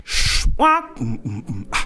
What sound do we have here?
Sound created by André Takou Saa in Foumban, Cameroon